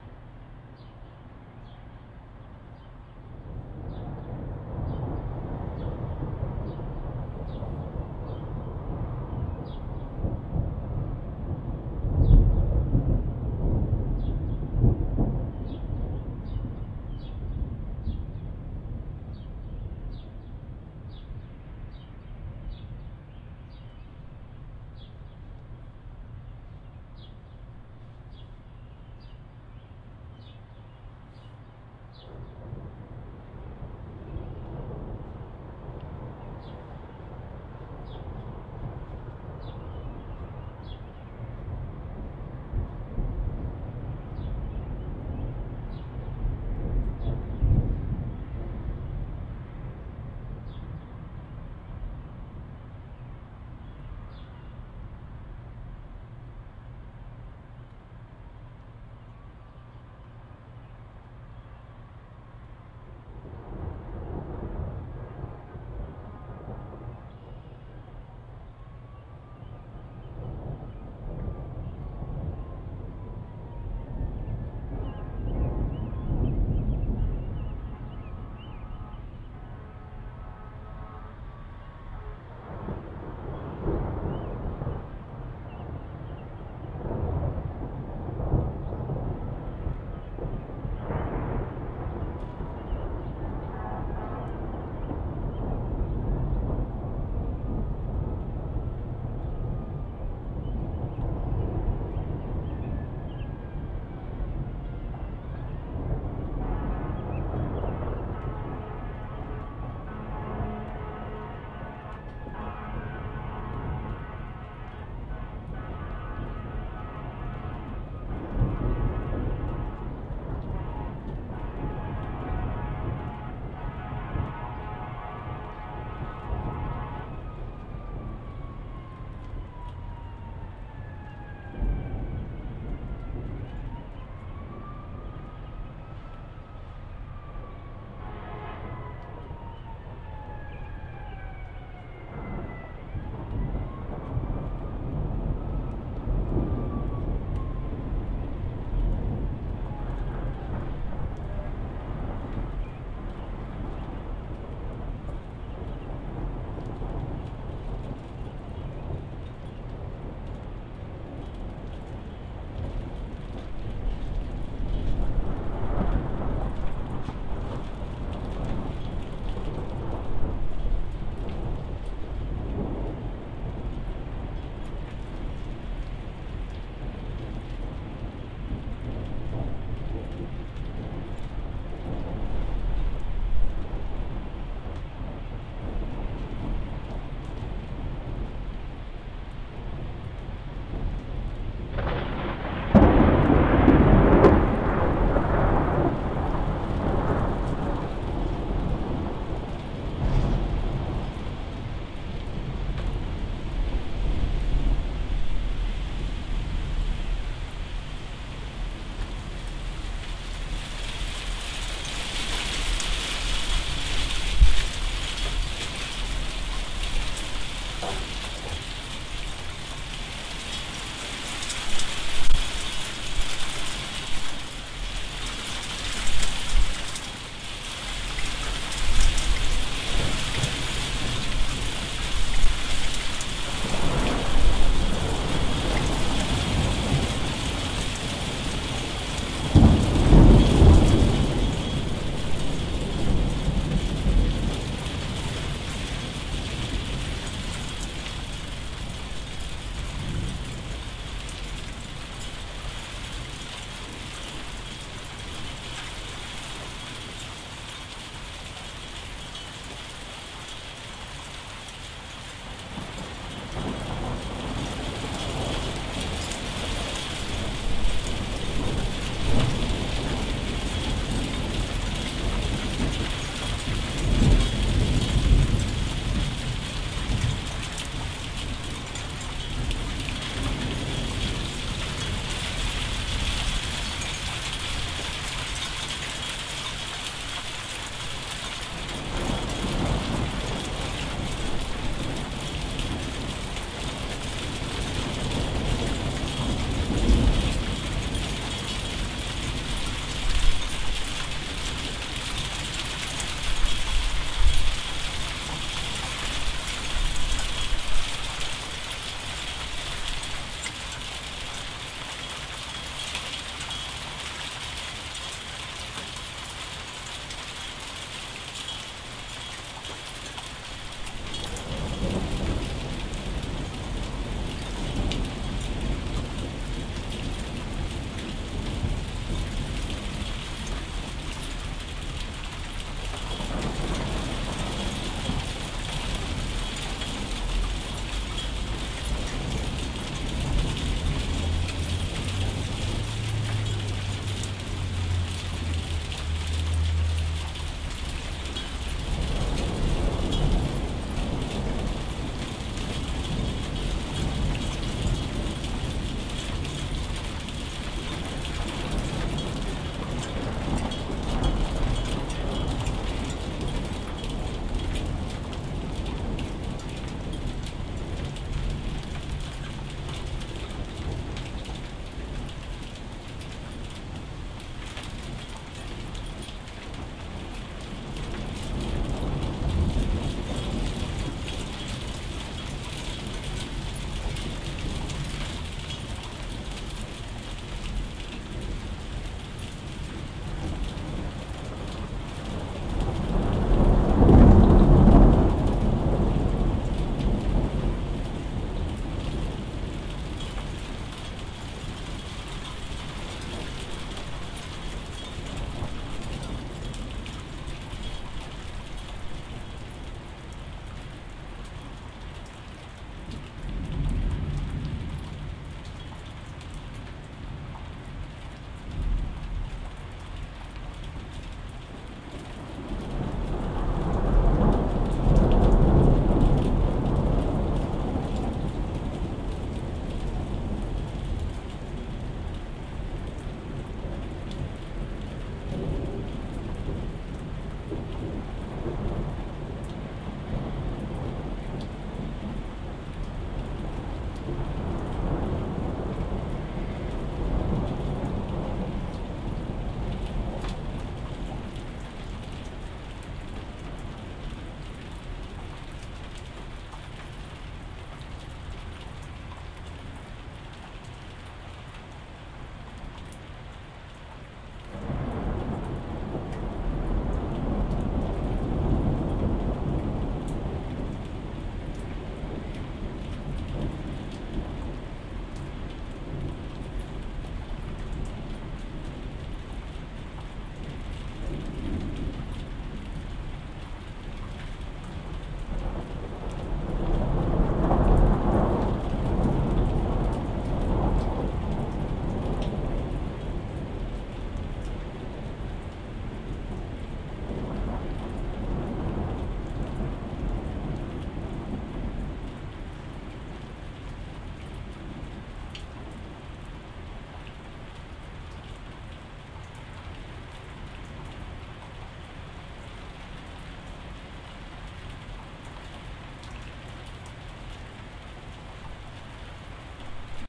Here's a clip of a spring thunderstorm in the city where I live. It's the first really big thunderstorm of the season... with birds chirping and sirens blaring for added effect! Around 3:35 you'll hear the sound of small bits of hail landing on my balcony, but it just sounds like heavy rain. The entire file was recorded using a Rode VideoMic connected to a TASCAM DR-7 digital audio recorder. A treble boost was added in post-production.